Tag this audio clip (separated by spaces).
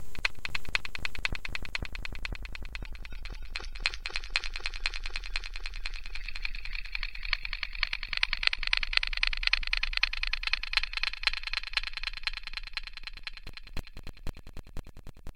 click echo reverb